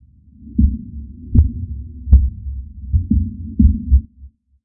giant footsteps
just sound of a footsteps I made with audacity.